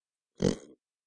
pig sound
sound, pig, oink, nature, animal